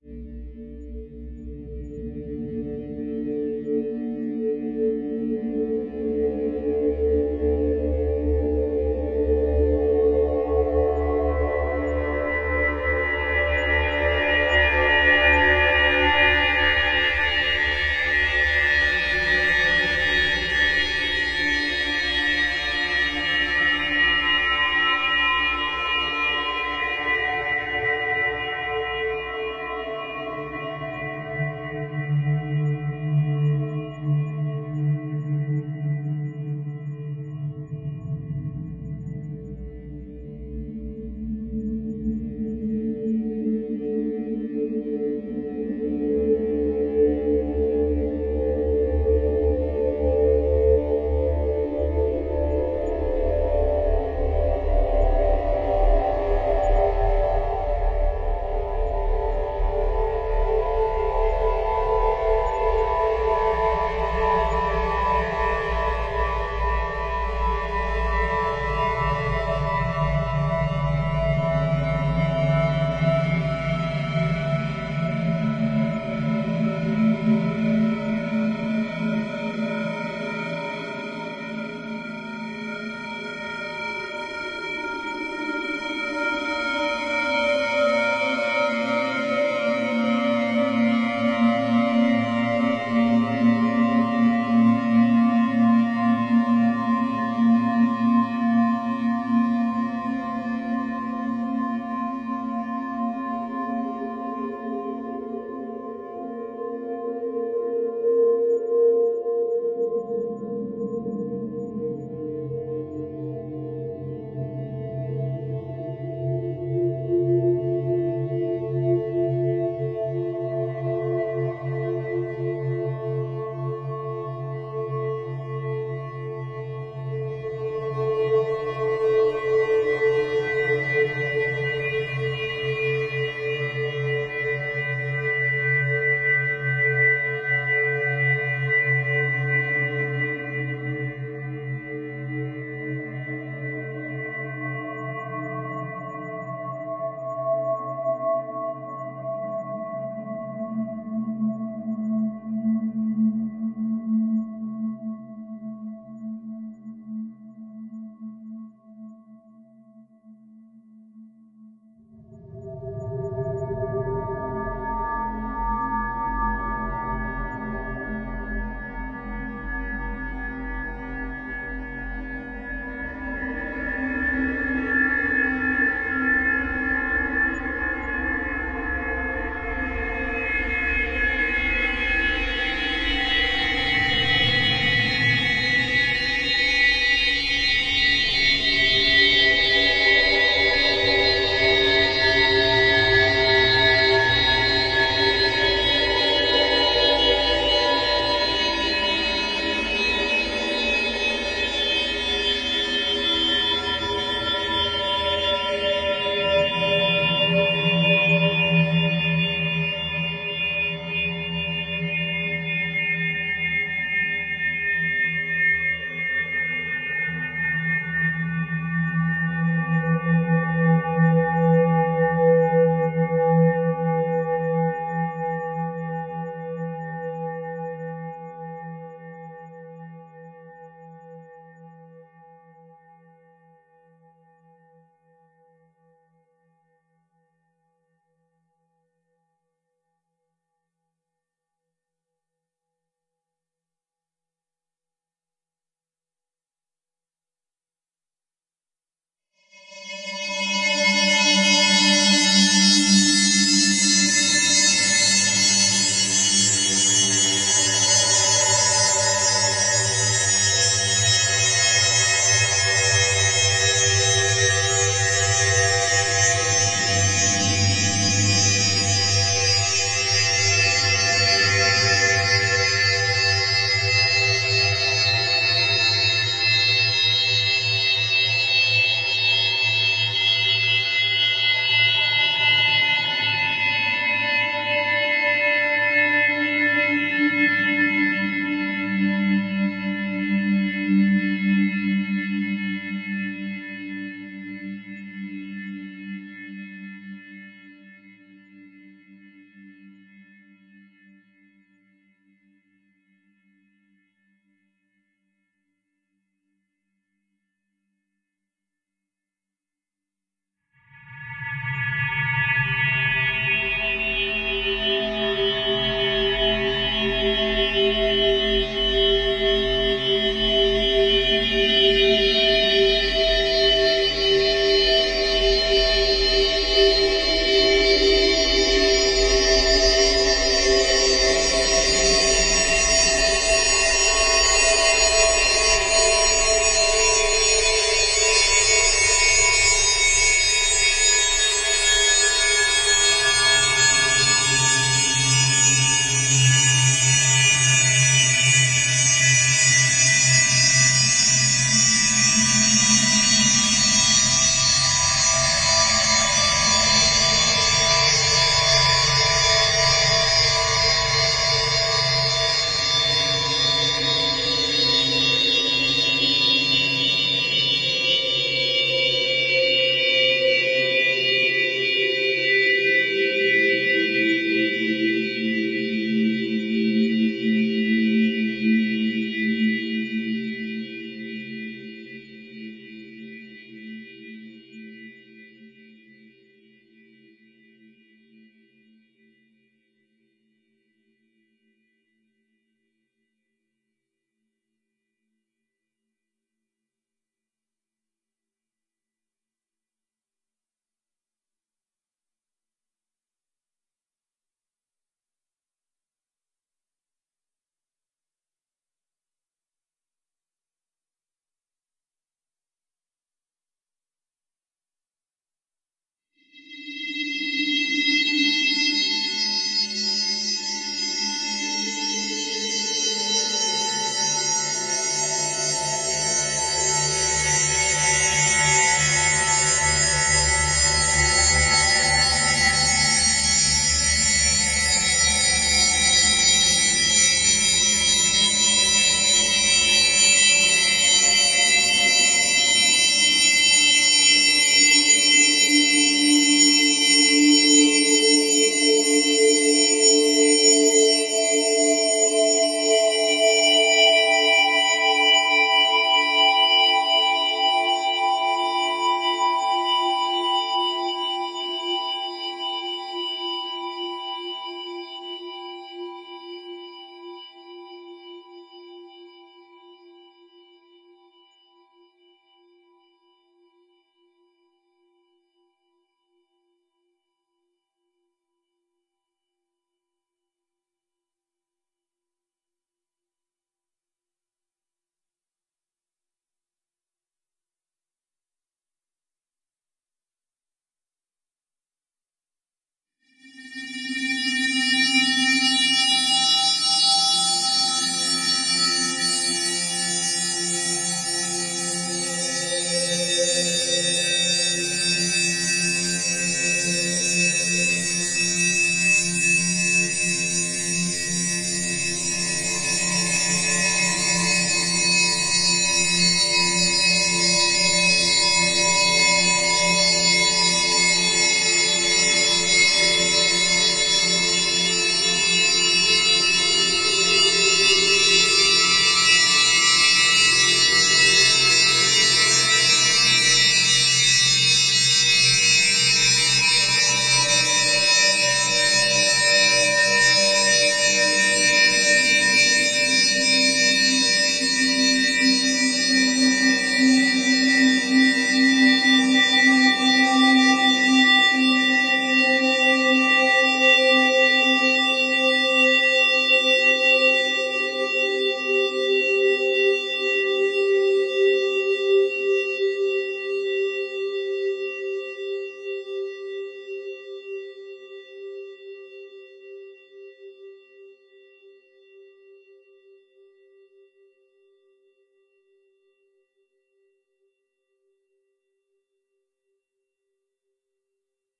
Spectral 002ax10
This sound was an obvious target for stretching
Stretched with paulstretch x10 original length.
spectral, SpecOps, sound-synthesis, dissonant, paulstretch, sound-design, stretched